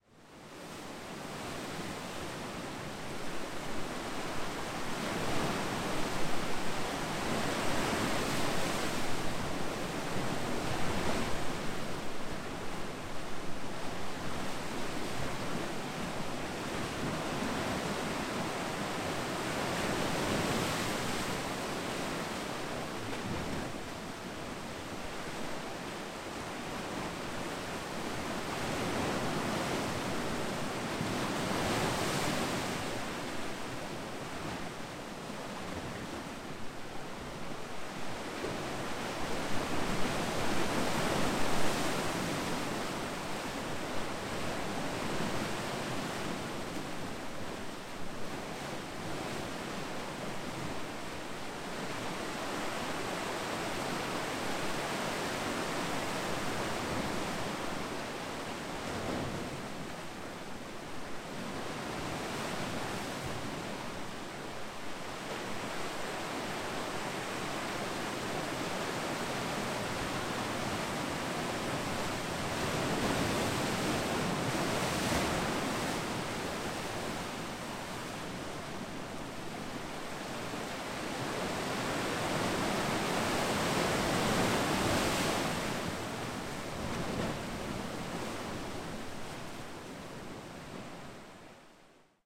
rough inconsistent waves
inconsistent beachbreak in Madeira
Recorded with Rode VideoMicro and Rodeapp in iPhone
atlantic, inconsistent, nature, sea, seashore, splash, tide